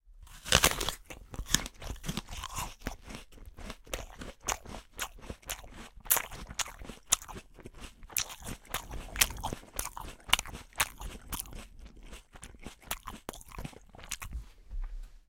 Eating An Apple 03
Me eating an apple
RODE NT-2A